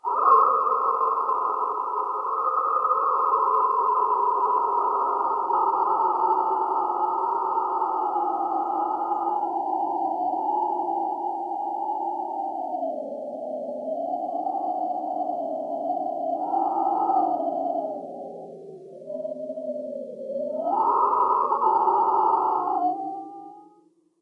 Unscreamed, vol. 1
I'm going to place some parts of damped scream. Another pieces coming soon if you like.
macabre, spooky, thrill, scary, scream